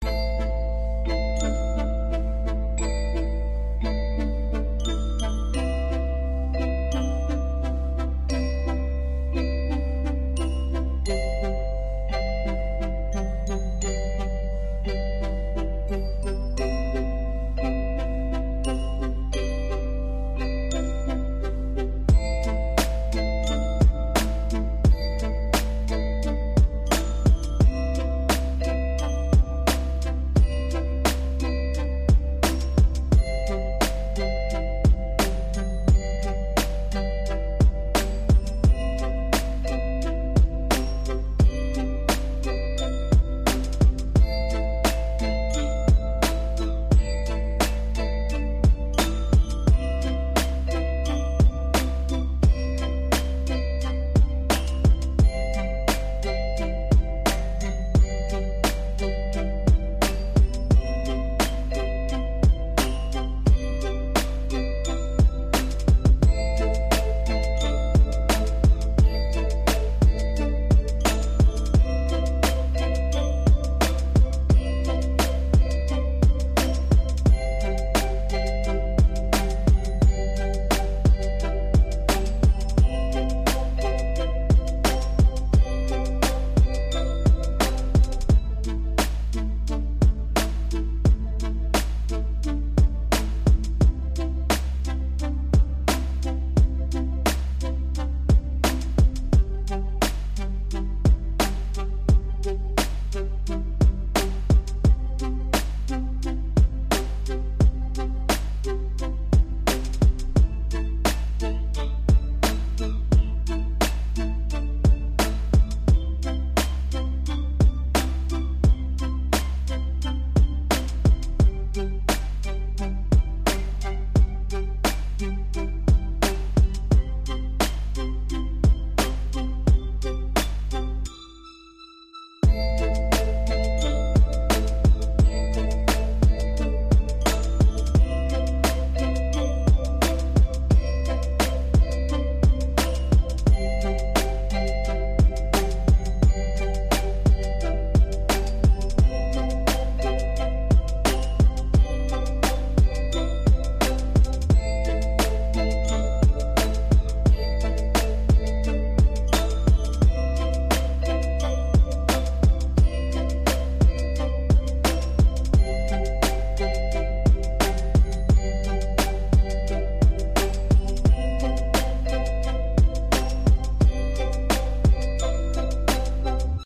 Beat Background Music Loop
Genre: Beat
I made a beat for game background music that can be use to anybody. Anyway I'm using FL Studio with free Sample Pack and presets.